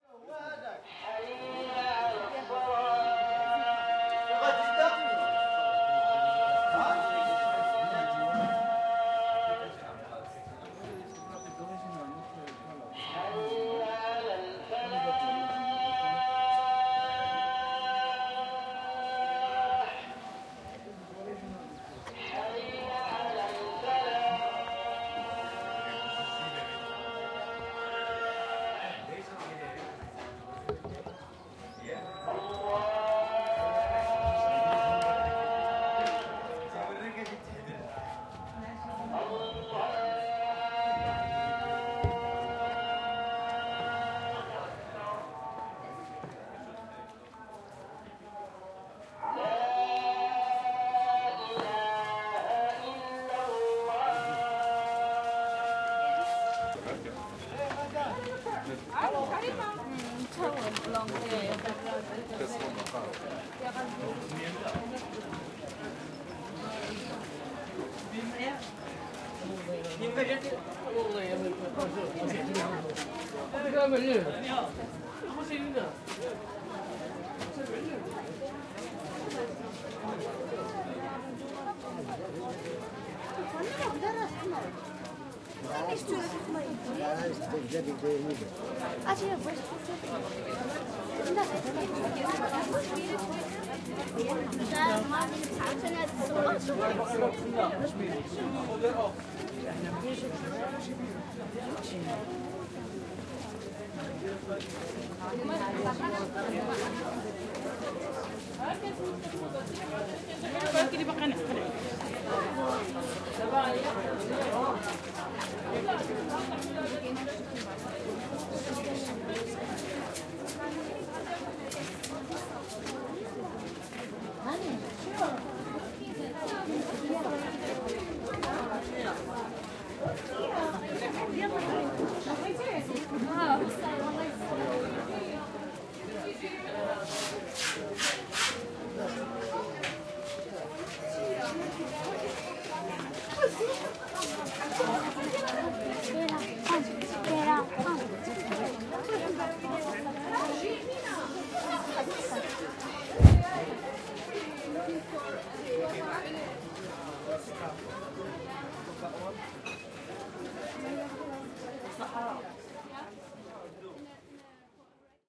Muezzin Marrakech 2
Muezzin in Marrakech calling for prayer